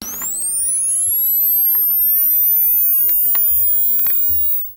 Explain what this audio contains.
one use camera flash load
flash load of a one use camera (very close!!)recorded with sm 58 mic in mackie vlz and tascam da 40 dat.
flash, load, one-use